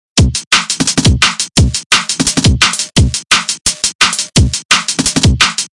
dnb beat 172bpm
break, beat, loop, drum